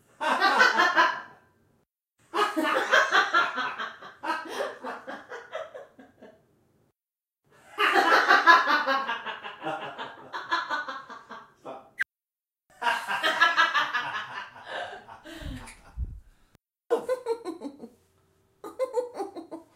Male Female Laugh Room Sound
Short bursts of male and female laughing with room sound. Recorded with Zoom H5.
Voice, Laughter, Laughing